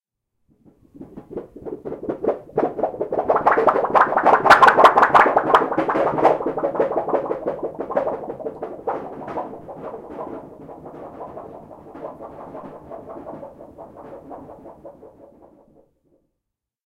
Sheet Metal
Here is the sound of a metal warble
metal, metallic